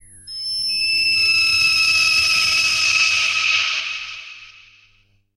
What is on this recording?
An artificial scream, produced via a feedback loop and an delayed octaver effect on an guitar amplifier.
amp: Laney MXD 30
cry, synthetic, scream